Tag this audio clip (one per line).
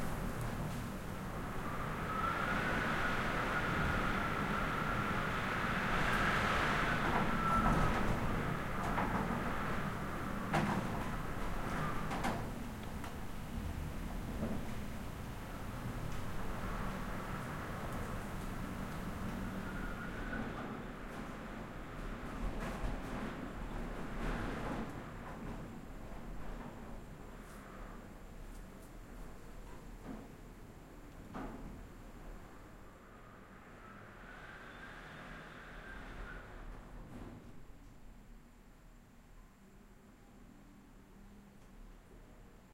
windy
storm
wind
whistle